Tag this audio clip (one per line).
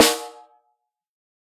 1-shot
velocity
drum
snare
multisample